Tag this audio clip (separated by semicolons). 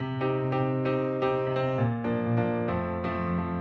bigbeat; loop; steinweg; klavier; steinway; funky; funk; beat; grand; 132; piano; grandpiano; big